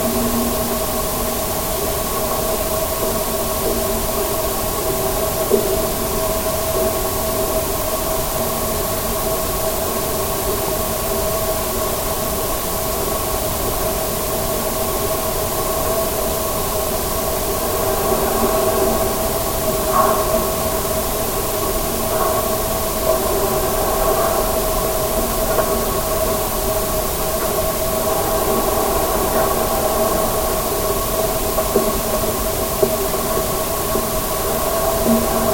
Denver Millennium Bridge 08
Contact mic recording of the Millennium Bridge in Denver, CO, USA, from the upper west-most stay (longest reachable from the bridge deck). Recorded February 21, 2011 using a Sony PCM-D50 recorder with Schertler DYN-E-SET wired mic.
Sony, Colorado, mic, normalized, Denver, PCM-D50, contact-microphone, wikiGong, bridge, field-recording, Schertler, contact, Millennium-Bridge, pedestrian, DYN-E-SET, contact-mic